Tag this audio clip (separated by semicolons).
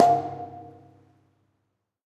ambient; drum; field-recording; fx; hit; industrial; metal; percussion